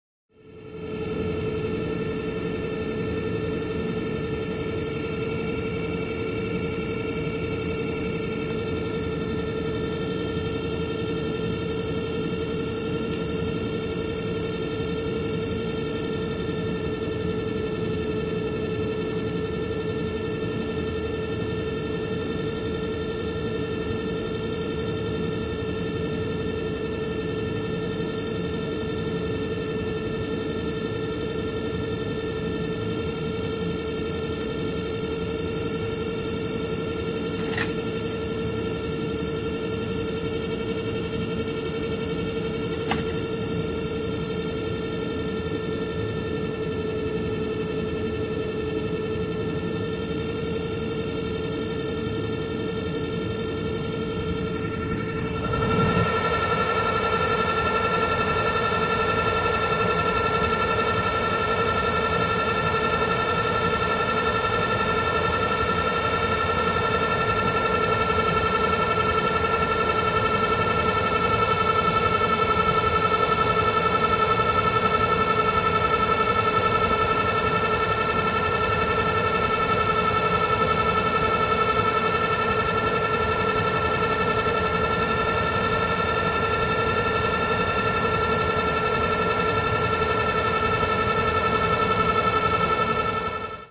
Machinery Hum
Very loud hum of some strange machinery
Machinery, Room-Tone, Hum, Loud